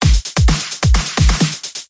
Cool loop I created using FL Studio 12. the first in a series. Enjoy!